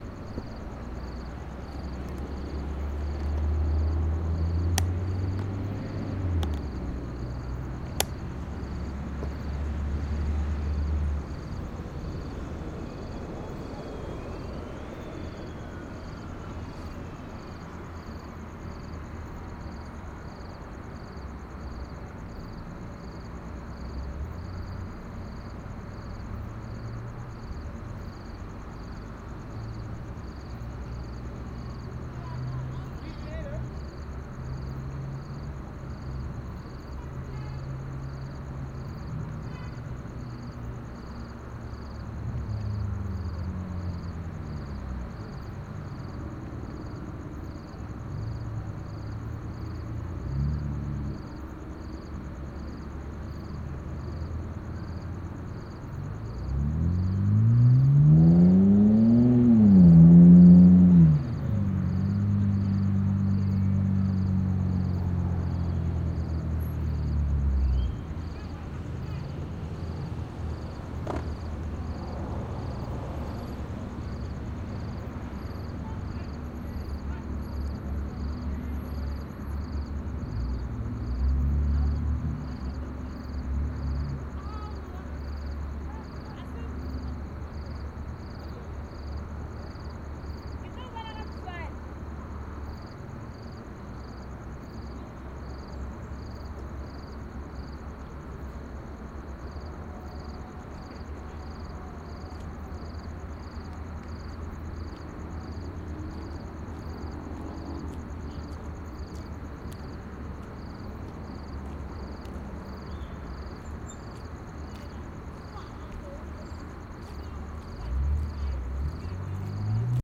The atmospheric sounds of Tshwane University of Technology around 18:00 in the evening.